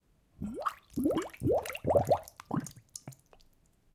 water bubbles 06
Water bubbles created with a glass.
bubble, bubbles, water